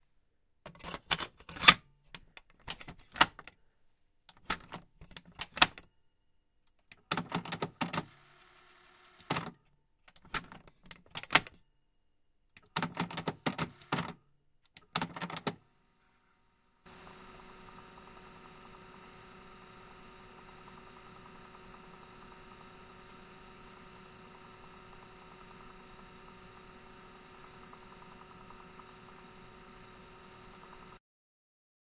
casette being loaded and rewound
Cassette tape being loaded and rewound.